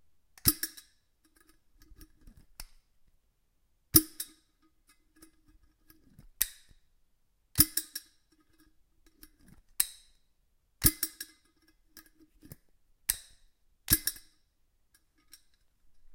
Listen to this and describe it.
Kitchen Kilner Jar 03
This recording is from a range of SFX I recorded for a piece of music I composed using only stuff that I found in my kitchen.
Recorded using a Roland R-26 portable recorder.
Percussion, Kitchen, Household, Cooking, Indoors, House, Home, Foley